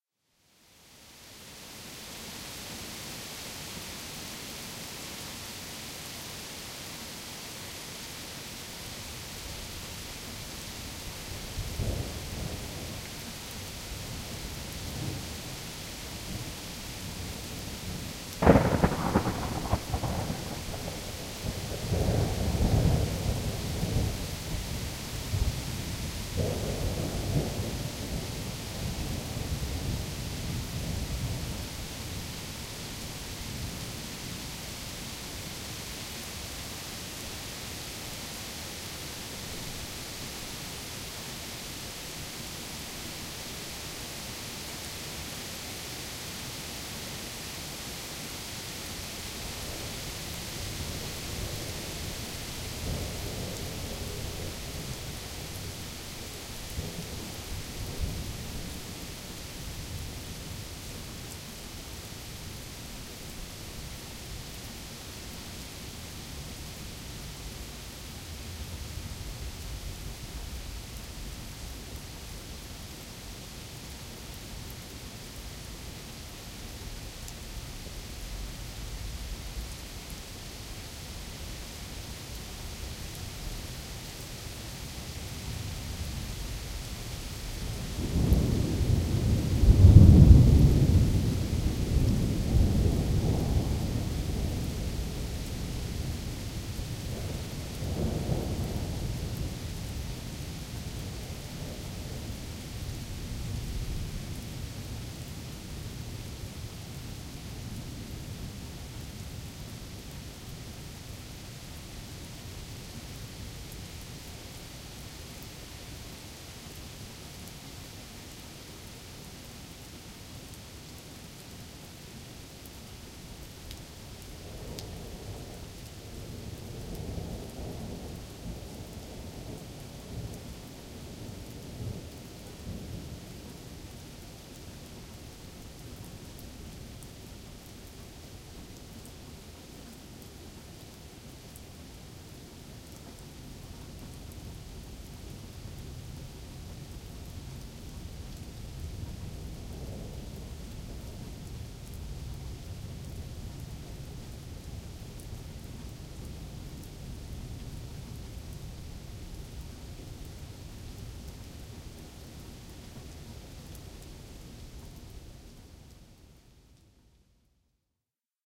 Recorded with my old Zoom H2 in my backyard. 10:00 pm 10/07/2014